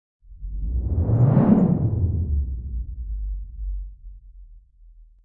CP Subby Whoosh
Just a short, bassy whooosh. A little doppler up and down in there.
swoosh, whoosh